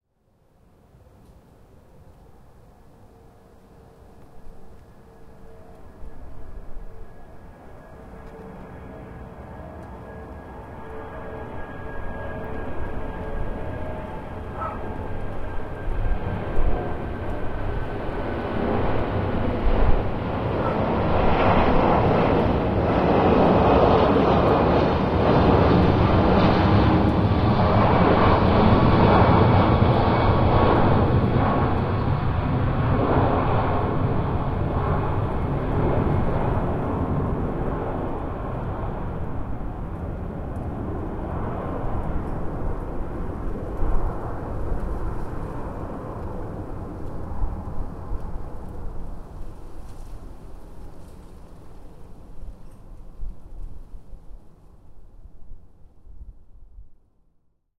Airbus A340-500 takeoff
Airbus A340-500 taking off; engine type is likely Rolls-Royce Trent 500.